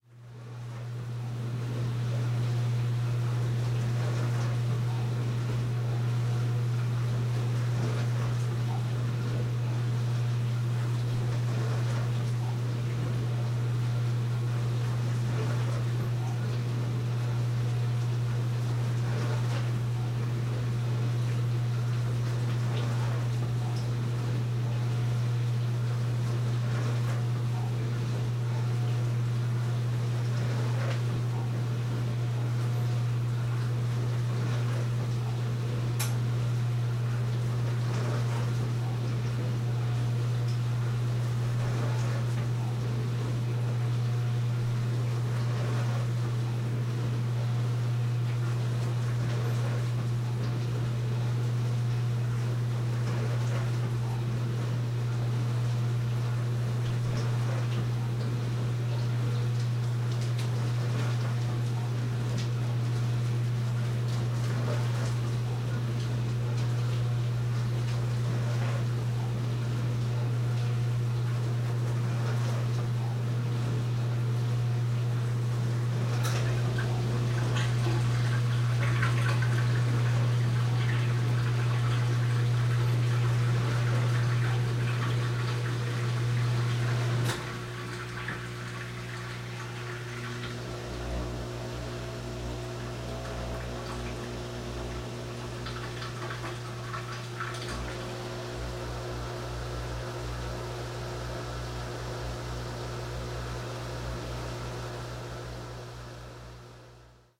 Kitchen Ambience dishwasher on
dishwasher, washing